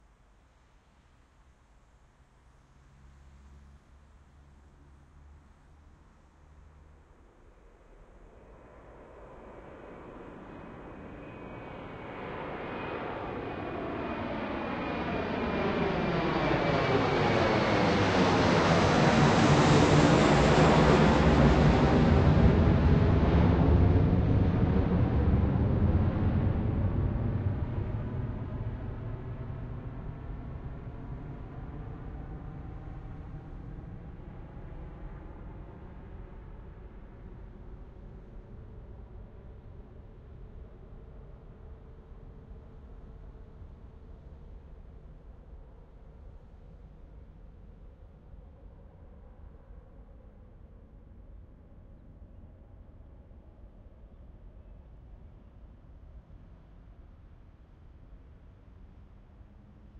Jet airplane take off with strong ground whoosh.